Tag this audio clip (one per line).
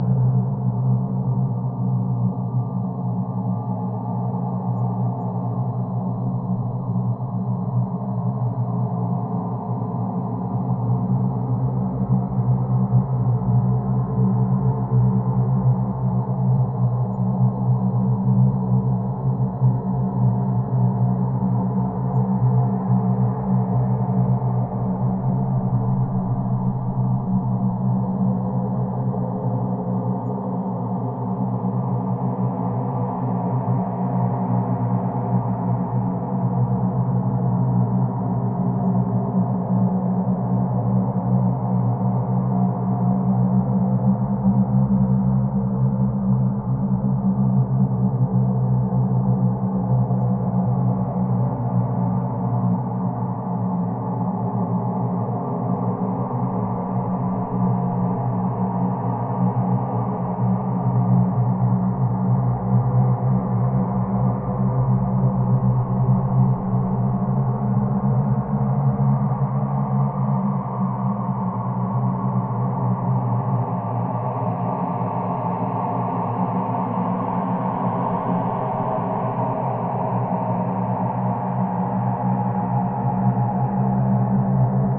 hyperdrive
engine